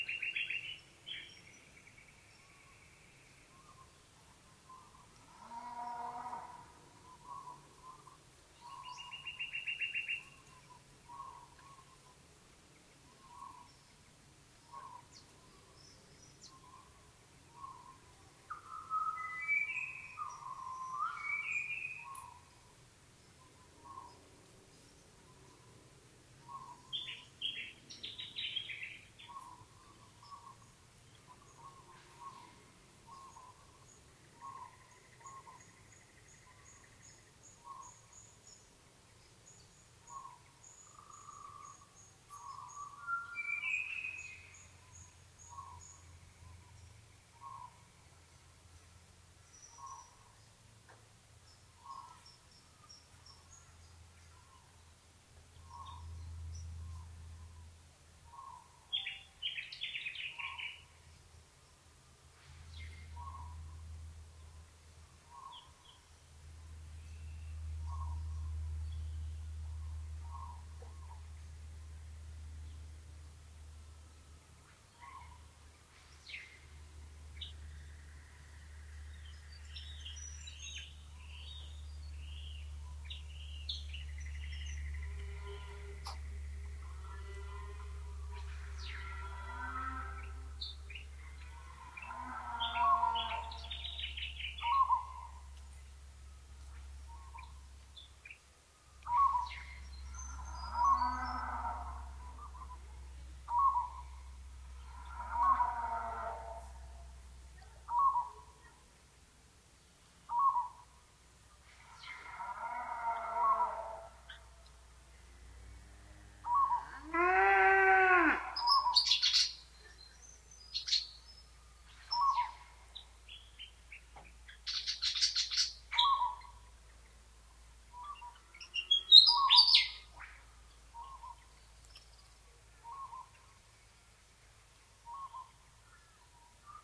2/3
A field recording of cows, whip birds and kookaburras (among others). The calves are weening from their mothers so there is a lot of distant and near mooing.
Recorded on a Zoom H4n with a Realistic PZM microphone. Noise reduction in Adobe Audition